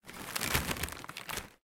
CEREAL SOUNDS - 44
clean audio recorded in room ambience
breakfast, foley